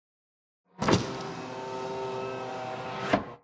MITSUBISHI IMIEV electric car POWER WINDOW roll up int
electric car POWER WINDOW roll up
electric, car, WINDOW, up, roll, POWER